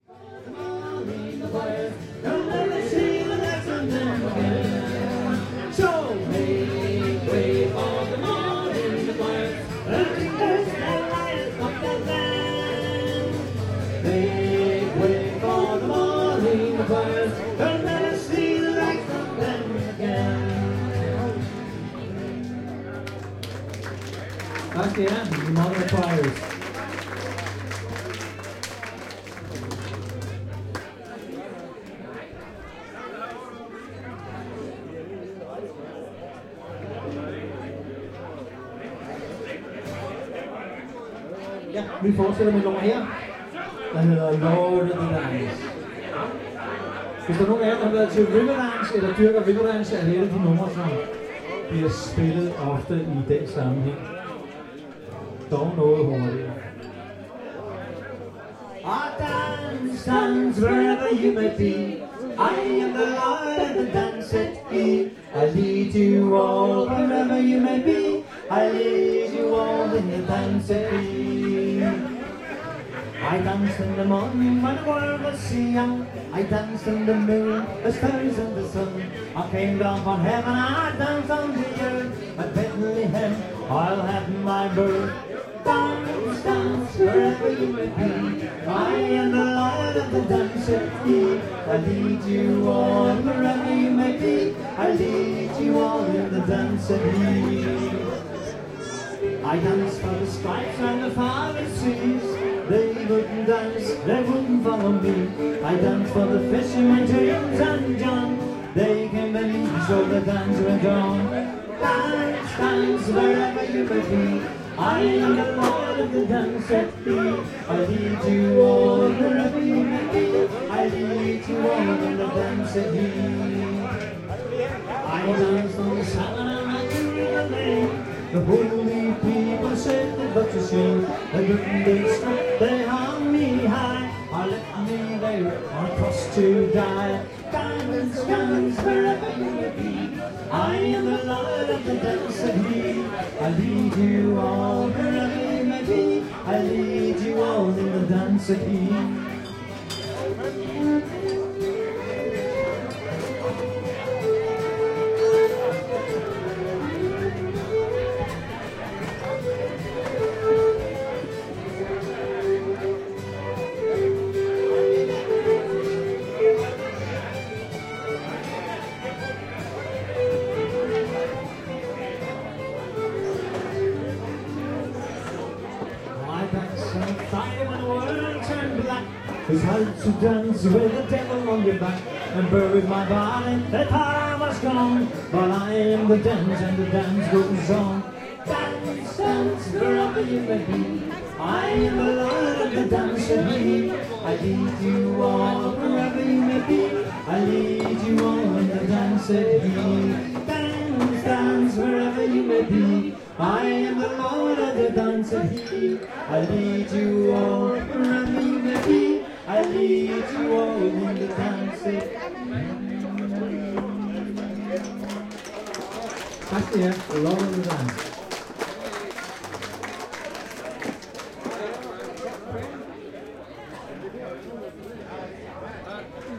Sct Hans irish song 2012-06-23
The celebration of mid summer continues inside a large tent, where two musicians entertain with some very nice irish folk music.